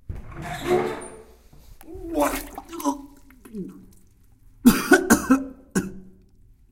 someone vomiting in a bathroom.
bath, bathroom, toilet, UPF-CS14, vomit, WC